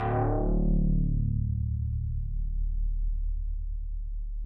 samples i made with my Korg Volca FM